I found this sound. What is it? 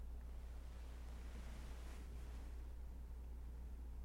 Rolling over in bed